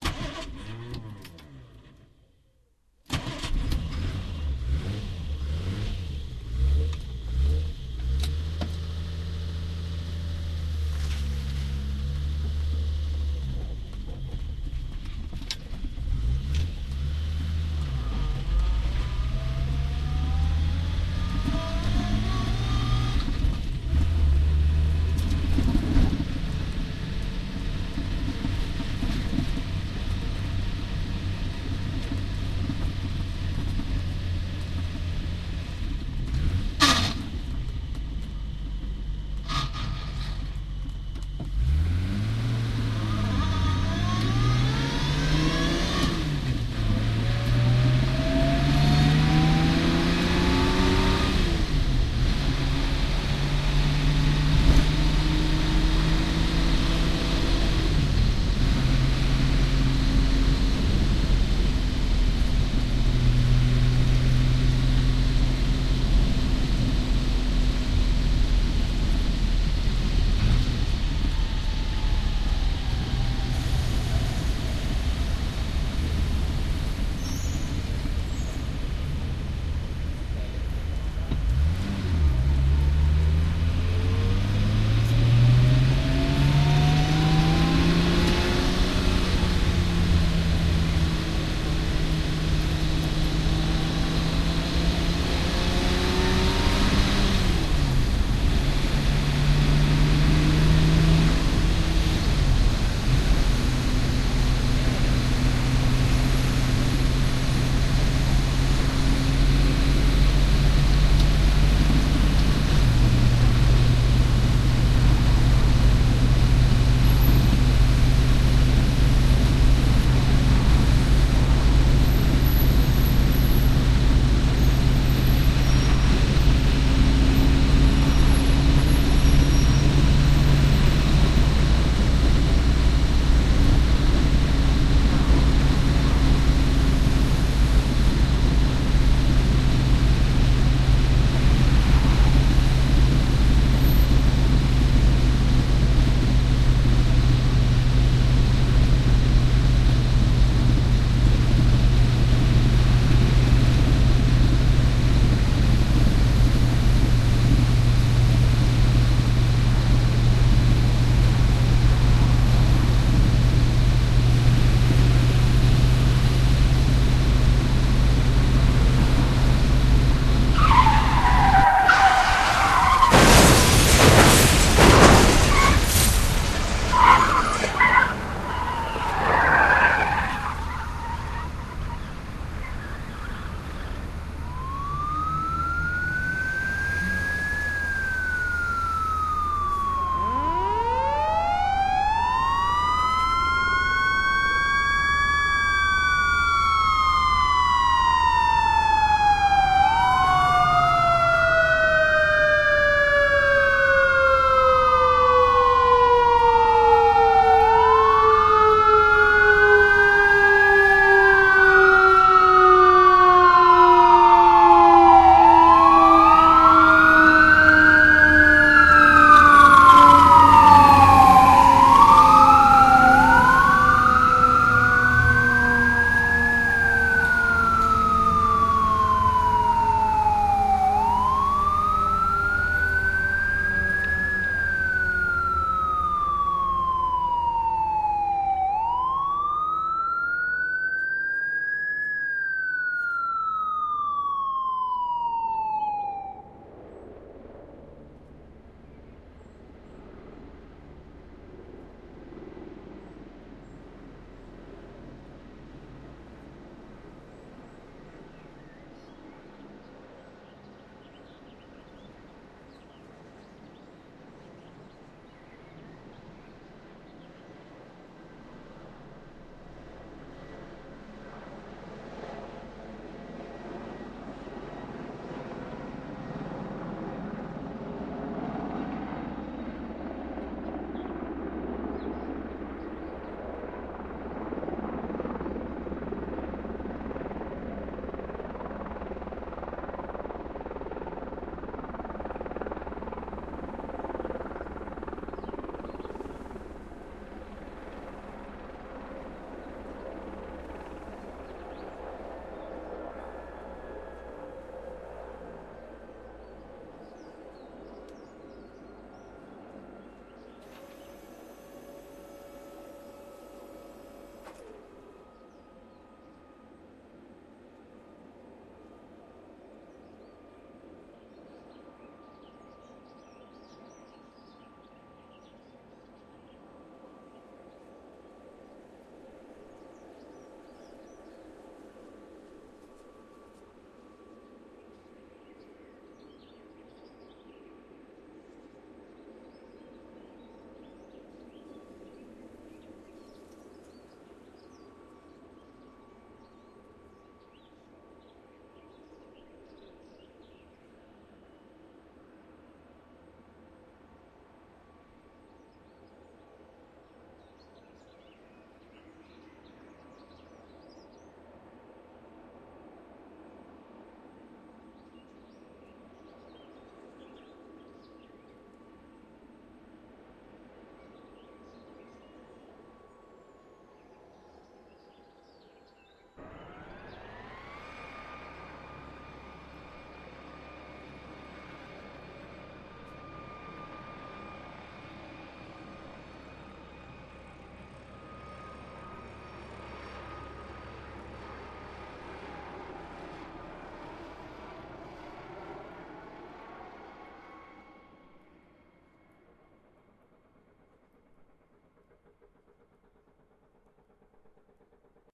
Car travel Accident
Like, it actually puts you in the place of a car accident! Take a listen and find out for yourself. For those who just want to hear a description, here it is. I used aspergineering's Triumph Vitesse Drive as the main car traveling sound. this file is very short, so at times it may seem very suddel. Do look for the sequence that follows afterward! Hey guitarguy, thanks for that amazing synth firetruck sound! Loved it! I'll remaster it if you want.
mash, distruction, car-accident, helicopter, crash, smash, cling, car, traffic, road, clang, sirens, accident, cars, slam, tires, glass